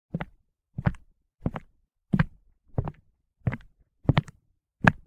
Walk Wood NormalSpeed
Me walking on my deck. Recorded with my Walkman Mp3 Player/Recorder. Simulated stereo, digitally enhanced.
floor, walk, wood